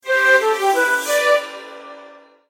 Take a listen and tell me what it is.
player-turn-start
This is a short notification for the start of a player's turn. Created in GarageBand and edited in Audacity.
synthesized, digital, notification, electronic